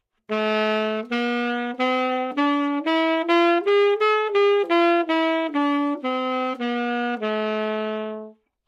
Sax Tenor - A minor - scale-bad-rithm-staccato-minor-harmonic
Part of the Good-sounds dataset of monophonic instrumental sounds.
instrument::sax_tenor
note::A
good-sounds-id::6231
mode::harmonic minor
Intentionally played as an example of scale-bad-rithm-staccato-minor-harmonic